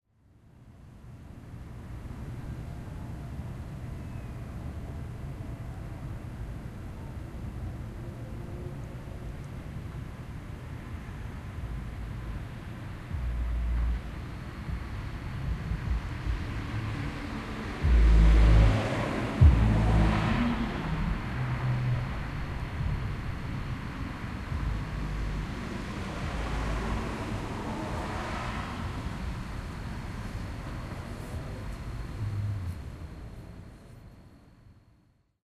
A windy fall night in a sketchy neighborhood. Crickets, and a car goes by booming the bass.
Hear all of my packs here.